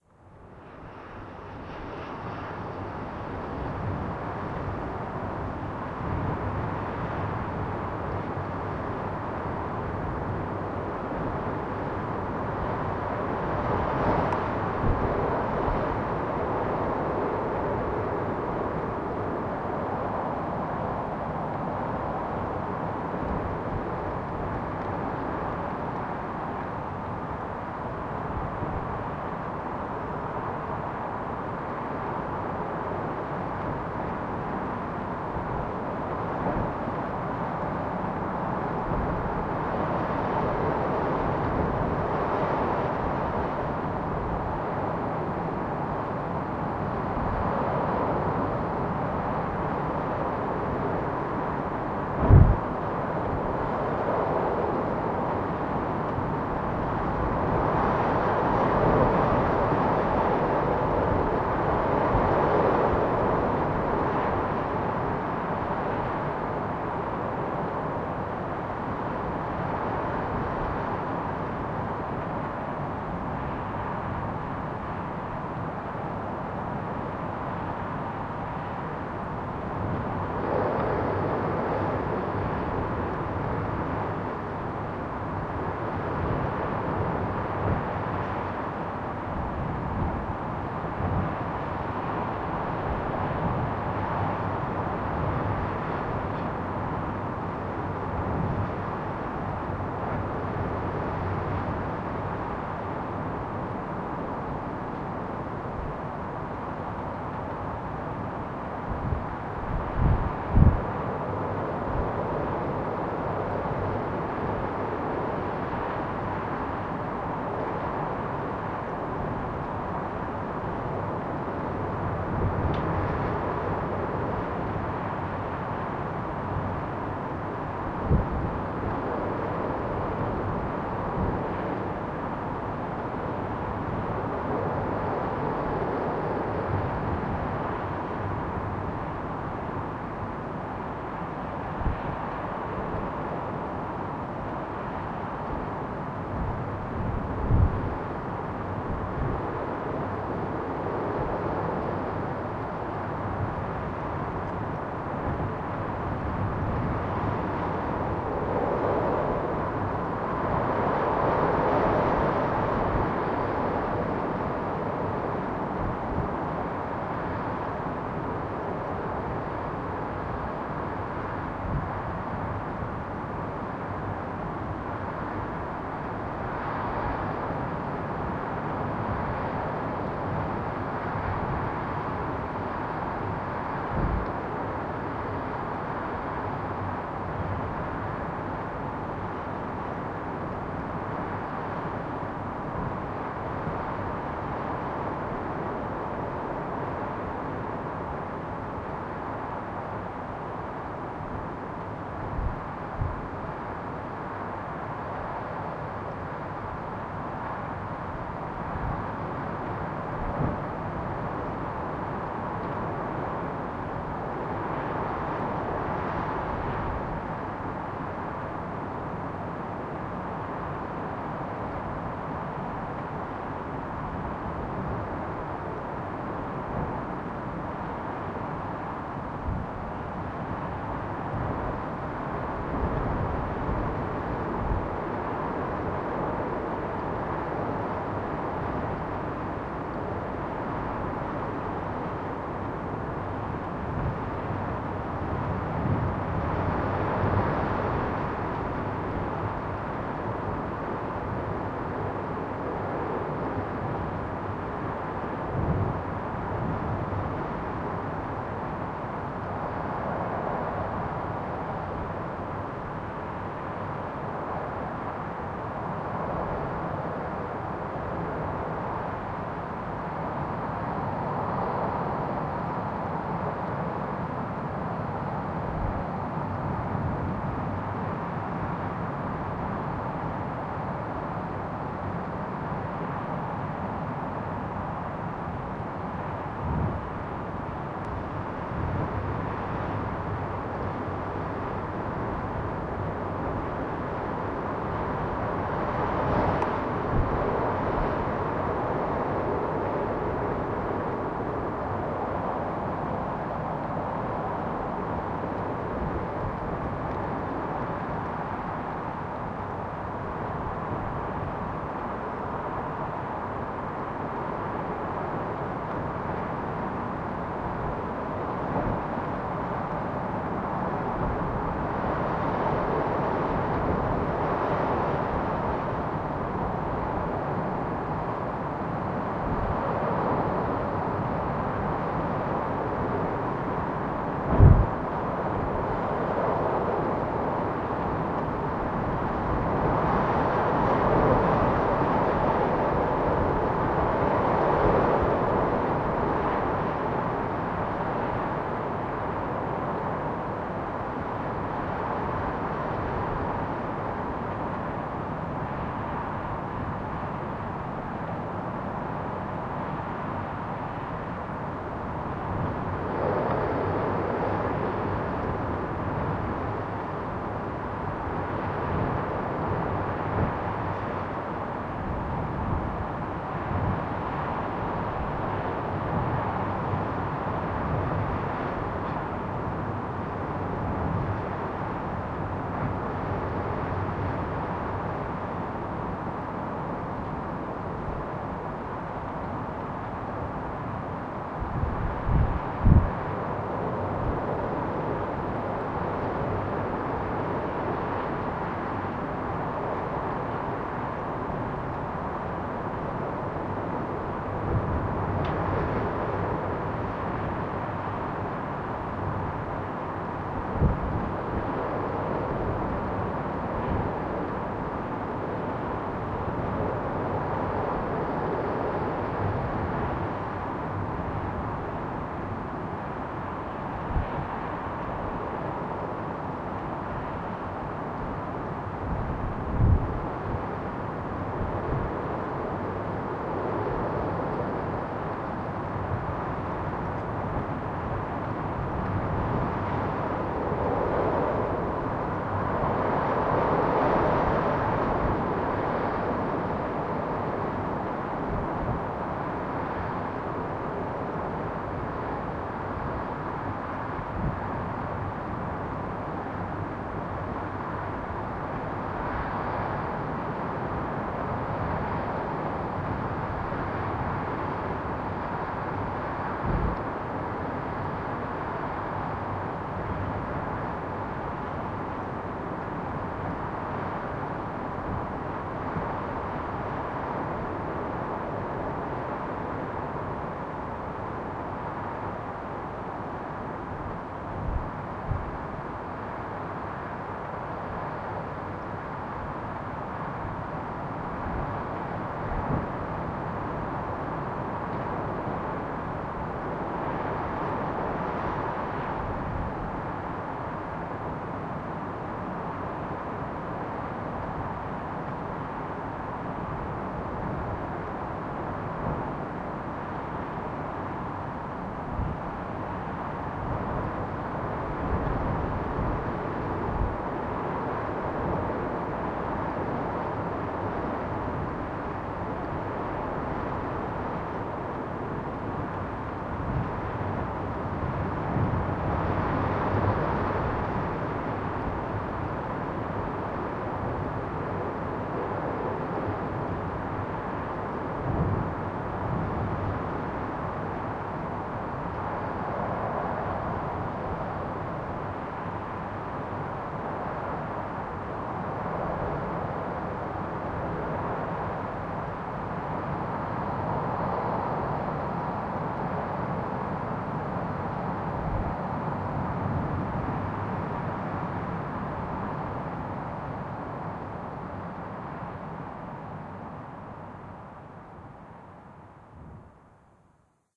Recording of the winter storm nemo passing through Isle of Skye. The recordings were made from inside a small house.